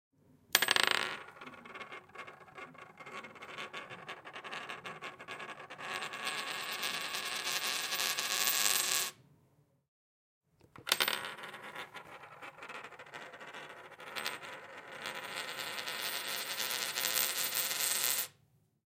SFX - Spinning Coin
Close-perspective recording of coin (South African 5 Rand) spinning on a composite (superwood) desk. Two takes.
Recorded with a Zoom H6 with XY capsule set to 90º, for a sound design class prac.